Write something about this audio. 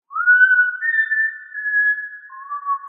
Just a simple whistle I attempted to make mocking the Hunger Games whistle